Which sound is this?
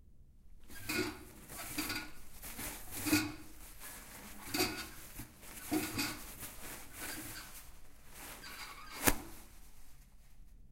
The sound of bathroom paper.
bath, bathroom, campus-upf, paper, toilet, UPF-CS14, WC